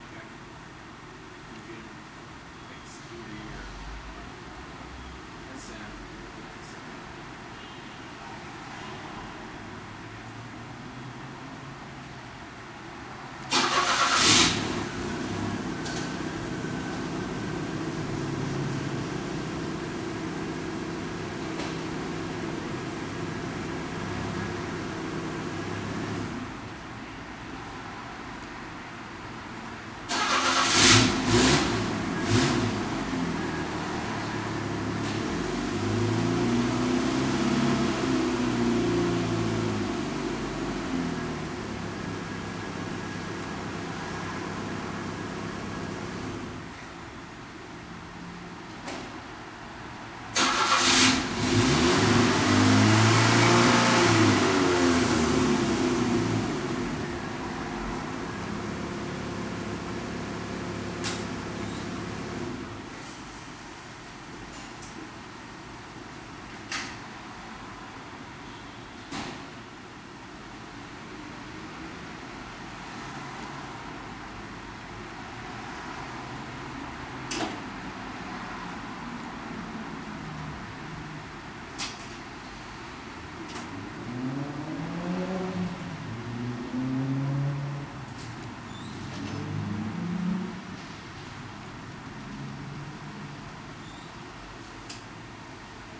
Short field-recording of my 1992 S-10 Chevy 2wd 2.5 4cylinder Pickup Truck Outside, into Ableton recorded with SM57